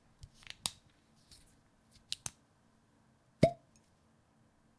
Sound of opening a bottle of Glenmorangie whisky (no pouring).
cork, whisky, open, pop, bottle